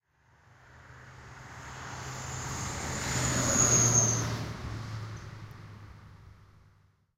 Car Pass Whistling - CherryGardens
Car passes on a country road, right to left making a whistling sound. I stopped on the side of the road to have a break and took the opportunity to grab some binaural field-recordings.
automobile, car-pass, country-road, field-recording